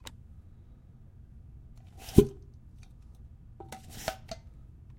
Jar Cap Suck
Taking of the cap to a jar. Nice suction effect
off, jar, cap, suck